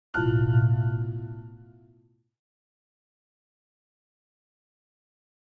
A soft sting made in Logic Pro X.
I'd love to see it!